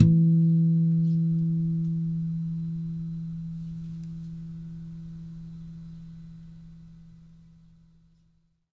simply flageoleto tone e played on Martin D1
I would just like to get note how it works for you and hear it of course.But it is up to you.
e, flageoleto, tone